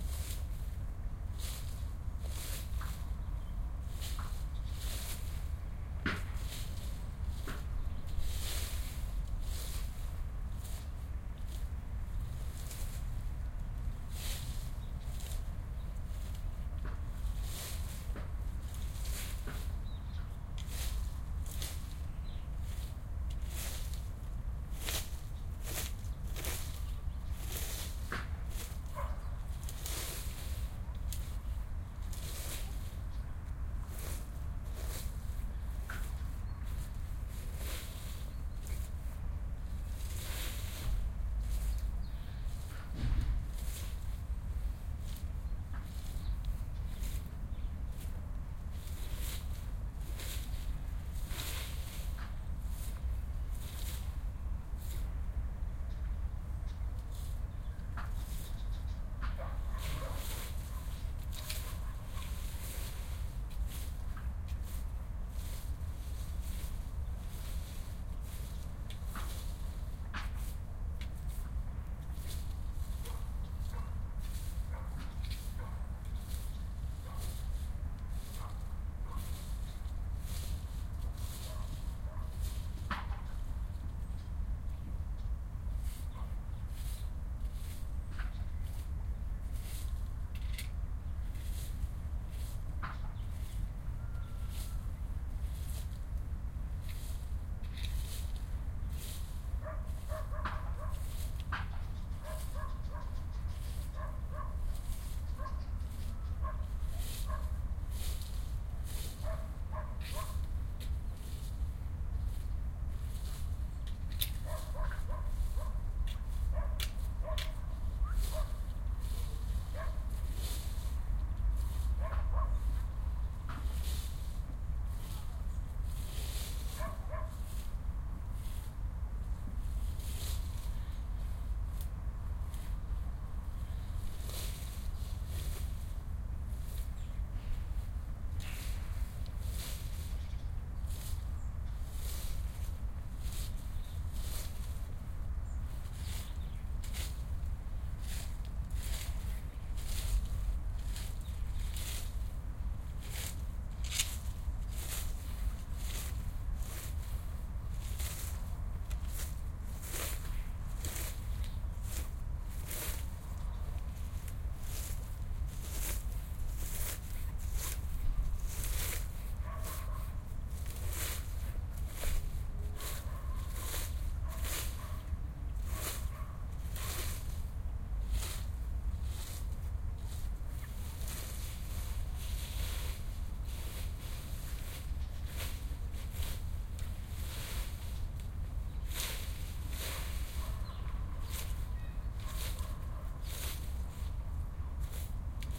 A typical chore of autumn: raking leafs of the lawn, recorded with a Sharp MD-DR 470H minidisk player and the Soundman OKM II.
binaural, field-recording, garden, lawnraking